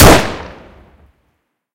Single Pistol Gunshot 3.2
Made with Audacity.
Pistol, Shooting, Gun